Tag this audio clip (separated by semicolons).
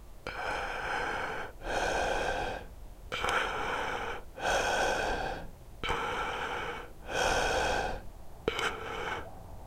breath; evil; zombie; undead; breathing; horror; creepy